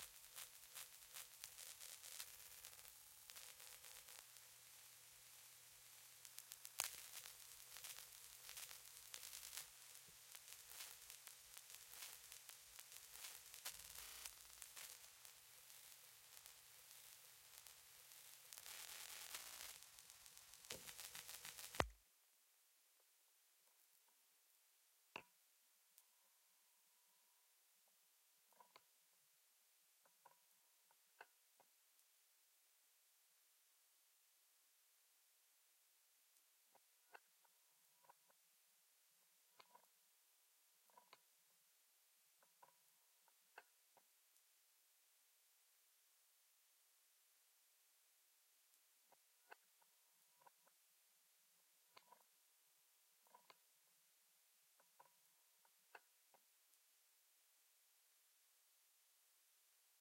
Nina Tweaked static
Static from record
Static, Record